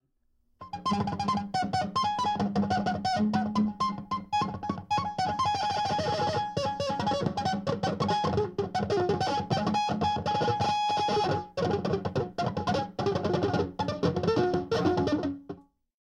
strange guitar sounds made with pedals